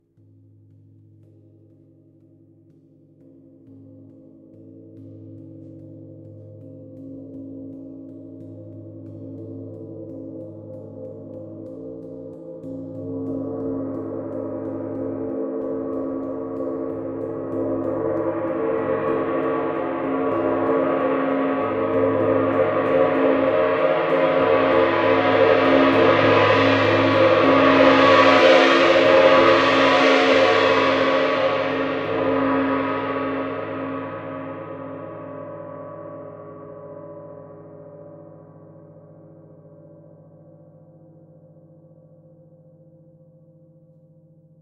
Gong buildup 45sec
A long gong-strike wash effect recorded in the field and later edited and processed
Gong-strike, Processed, Wash